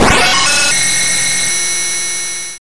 Electro Trash
Made this in Bfxr, could be cool for a weird computer malfunction noise.
8-bit, 8bit